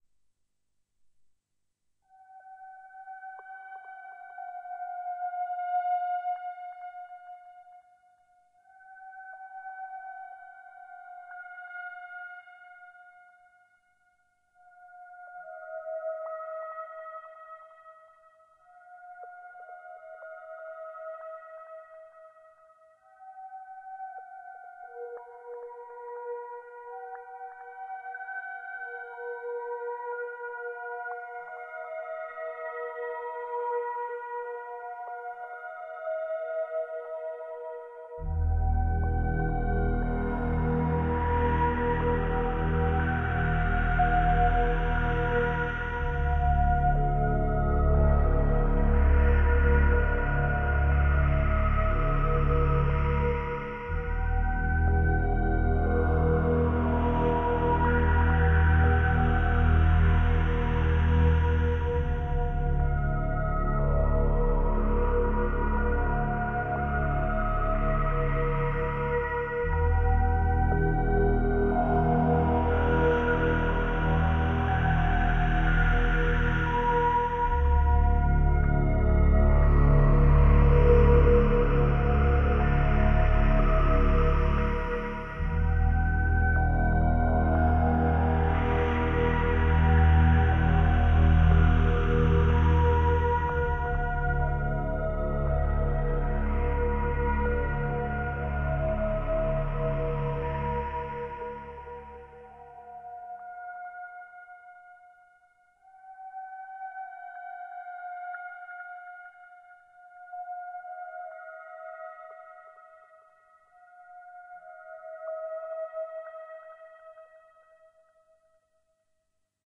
sci-fi music created for various purposes. Created with a syntheziser and recorded with MagiX studios.